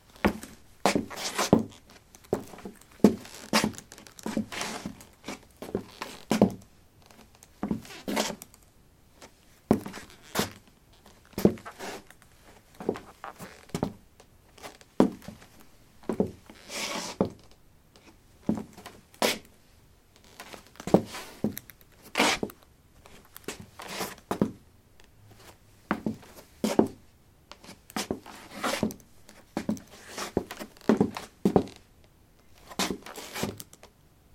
wood 17b boots shuffle
Shuffling on a wooden floor: boots. Recorded with a ZOOM H2 in a basement of a house: a large wooden table placed on a carpet over concrete. Normalized with Audacity.